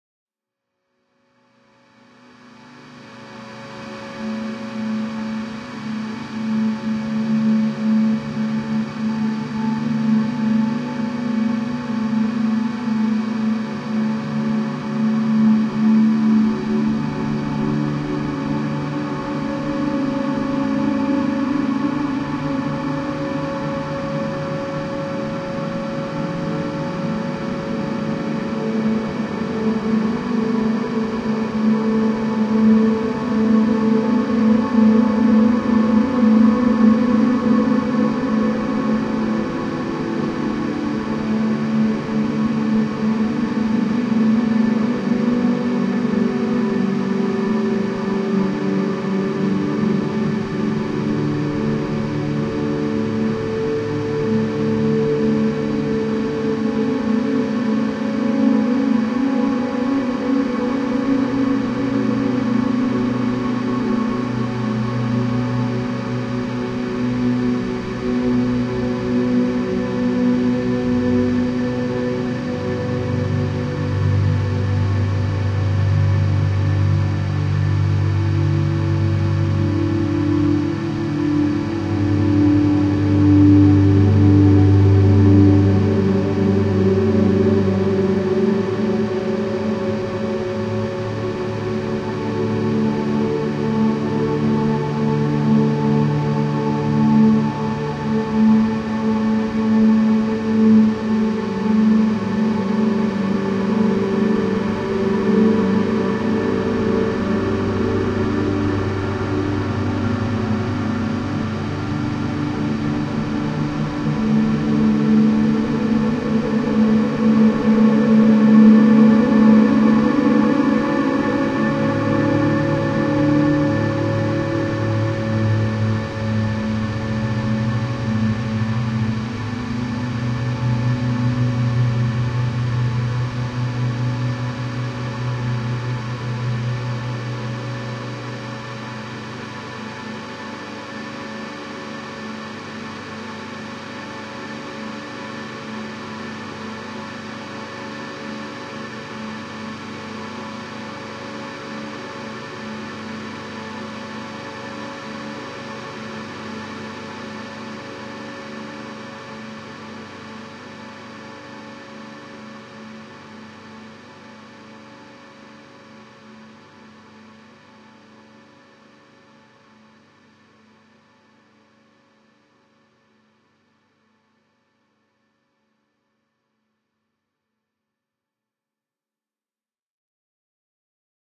This bass is my friend he helps me play the same notes over and over and over but he never tells me what they mean and sometimes my fingers hurt.
granular, dream, texture, drone, bass, ambient